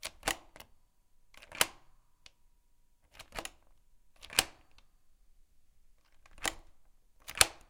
Lock on door
The lock on a door.